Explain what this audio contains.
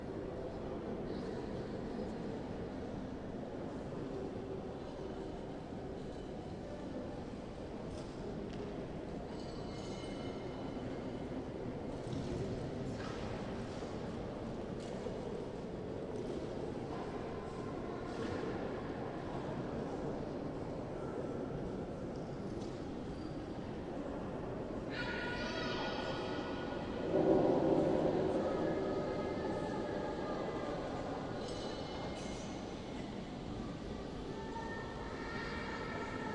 mbkl bistro wide
ambient recording of the bistro behind the main foyer of the "museum der bildenden künste" (museum of art) in leipzig/germany, taken from the gallery above the foyer, about 15 meters above ground level.voices of visitors and a child shouting.this file is part of the sample-pack "muzeum"recording was conducted with a zoom h2 with the internal mics set to 90° dispersion.